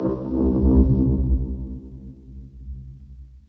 oboe grave
oboe processed sample remix
transformation, grave, oboe